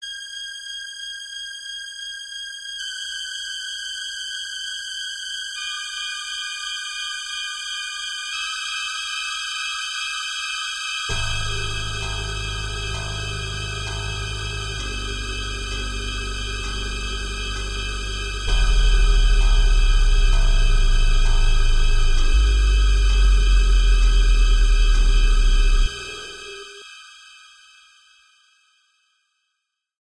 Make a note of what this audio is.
Thriller Score Horror Scene
Made back in 2005 for a Slasher Rap Beat on FL4 or 5.. Used Strings and a dark pad, also a slap bass. I was really into Bone Thugs n Harmony back then, so I really wanted to take the gangsta feel to a whole different level .. It just turned into a Slasher thriller Horror type beat .. ofcourse i took the beat off bc I wanted to share only the score..
If used on anything , I would like to watch or listen . If making for a scene, maybe I can extend it for you , Hit me up.
Background, Horror, Killer, loop, Movie, Music, Pad, Scary, Scene, Score, Slasher, Spooky, Strings, Thriller